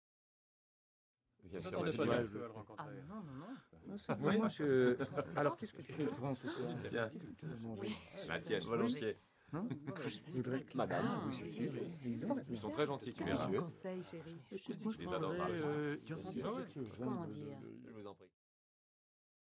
WALLA at the store
Looking at the wares in a small shop. (unused material from a studio French dubbing session)
ambience, dialogue, french, shopping, vocal, walla